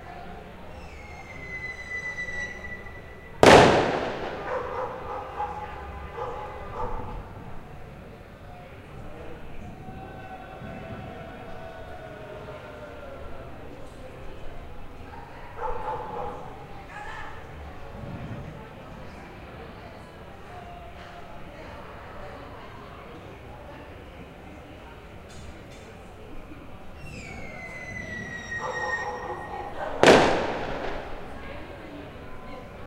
20060510.UEFAcup.crackers
crackers celebrating the victory of Sevilla FC at the 2006 UEFA soccer championship. Rode NT4>iRiver H120 / petardos celebrando la victoria del Sevilla FC en la final de la UEFA 2006